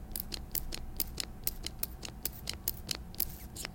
Spray Bottle
bottle, spray, spray-bottle, spraying